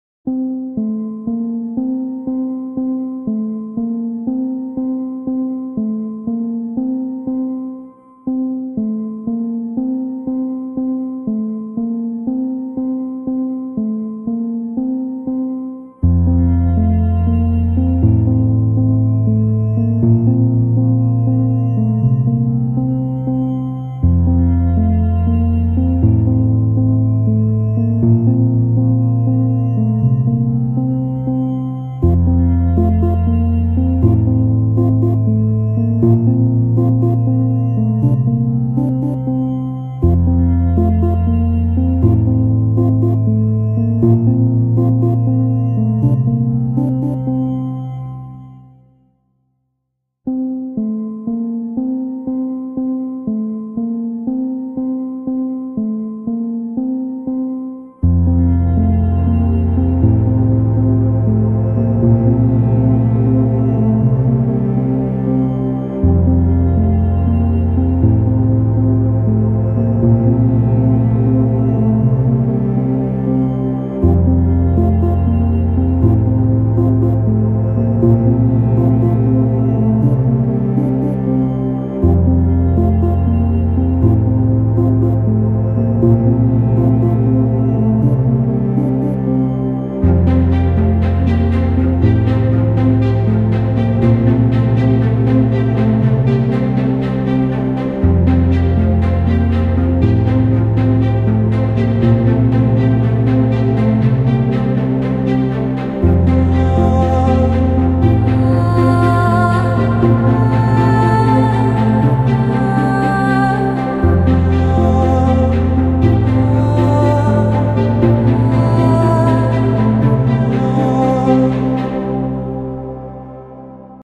After (no drums version)
ambient, calm, chillout, choir, downtempo, drama, electronic, female, music, relaxing, romantic, singing, strings, vocals